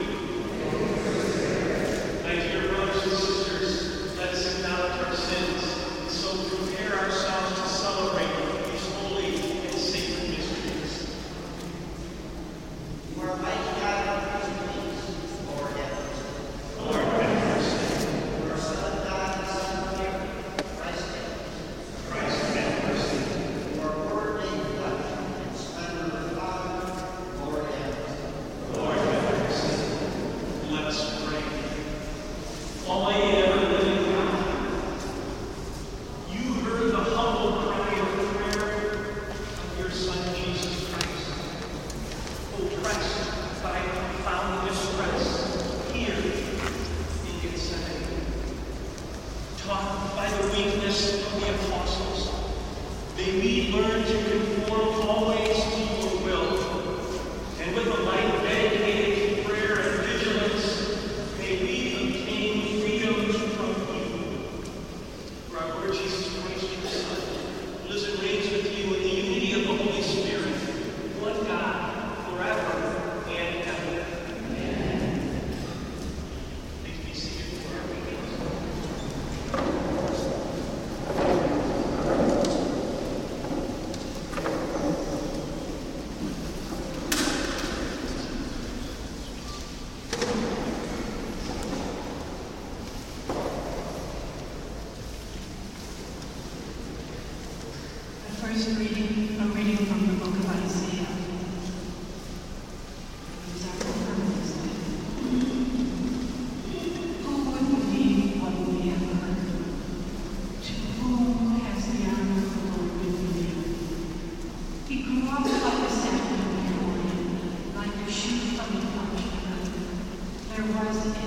Israel basilica agoniae domini
Worship in the Church of All Nations, next to the garden of Gethsemane. Catholic, in English.
Recorded: 17-06-2013.
Format: Mono.
Device: Galaxy Nexus
Posted with permission